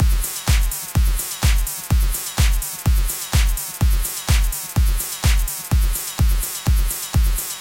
Clubgroove 2 - 4 bars
The sound are being made with VST Morphine,Synplant,Massive and toxic biohazzard.
house, Glubgroove, samples, trance, club